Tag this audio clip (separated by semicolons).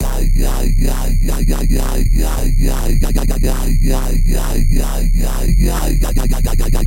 gritty; drum; dark; bass; dubstep; drumstep; bitcrush; filth; grimey; dnb; dirty; wobble; dub; dubby; drumnbass; loop; grime; filthy